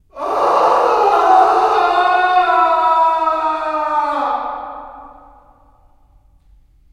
Scream ooa-1
Out of the series of some weird screams made in the basement of the Utrecht School of The Arts, Hilversum, Netherlands. Made with Rode NT4 Stereo Mic + Zoom H4.
Vocal performance by Meskazy
horror, pain, yell, death, screaming, scream, funny, fear, angry, darkness, anger, weird, disturbing, painfull, yelling